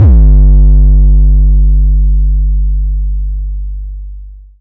some 808 i made in serum.
sorry but i dont know the key.